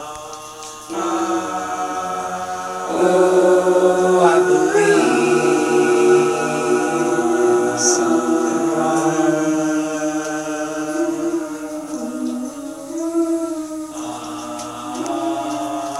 SUMTHIN Pt 2 Vocals
A collection of samples/loops intended for personal and commercial music production. For use
All compositions where written and performed by
Chris S. Bacon on Home Sick Recordings. Take things, shake things, make things.
beat, original-music, percussion, whistle, piano, voice, Indie-folk, acoustic-guitar, loop, bass, drum-beat, loops, samples, looping, harmony, indie, Folk, sounds, free, melody, synth, guitar, acapella, vocal-loops, rock, drums